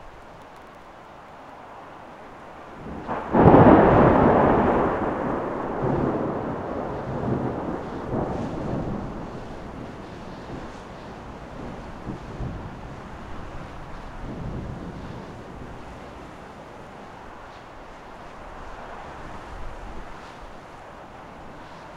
A single thunder clap and rain which is clean with slight distortion just over peak level. - Recorded with a high quality mic direct to computer.
rain, storm, weather